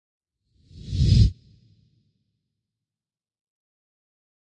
Fly By Woosh
Simple radio effects created with general sound efx and processing in Ableton Live Lite.
radio-imaging, sound-efx, sweepers